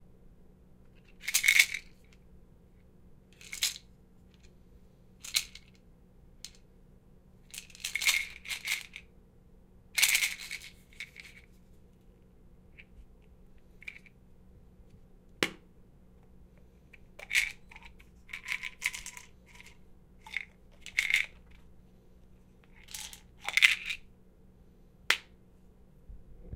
Shaking and opening a pill bottle